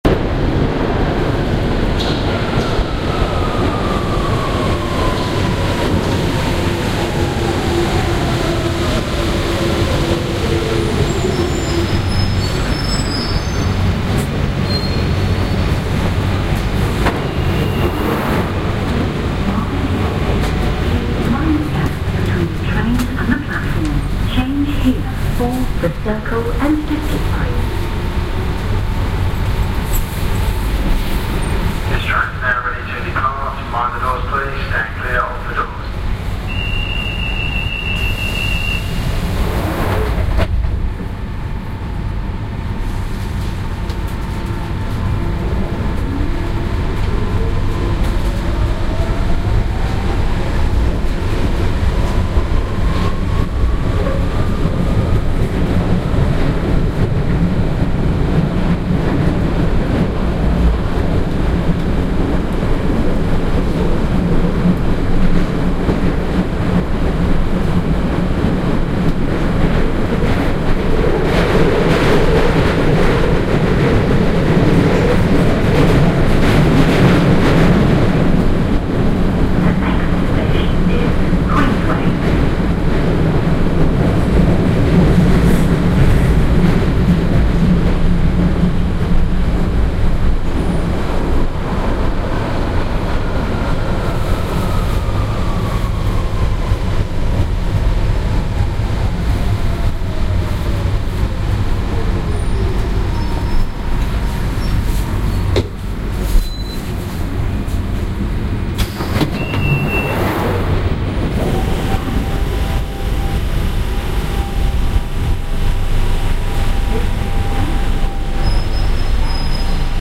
London Underground: Central line ambience
Sound of the Central Line on the London Underground 'Tube' system. Recorded with binaural microphones on the train.
departure, rail, tube, transport, platform, metro, trains, london-underground, subway, underground, headphones, binaural, announcement, field-recording, railway-station, arrival, london, railway